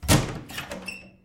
Opening a wooden door with a squeaky metal handle. Natural indoors reverberation.